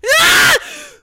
yell inhale
WARNING: LOUD
scared again
yell, scream, scared